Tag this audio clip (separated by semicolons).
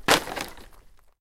pile; dropping; drop; fence; wood; wooden